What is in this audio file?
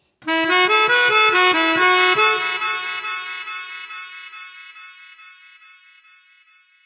DW 140 AM LIVE MEL LICK
DuB HiM Jungle onedrop rasta Rasta reggae Reggae roots Roots
HiM, rasta